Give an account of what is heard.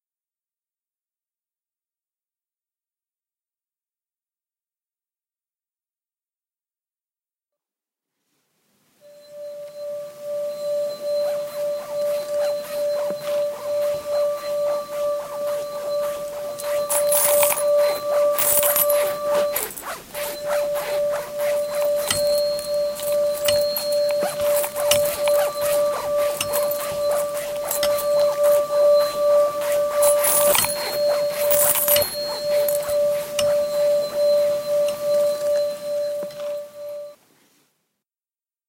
Sonic Postcard GemsEtoy Chiara
etoy, imagination, sonic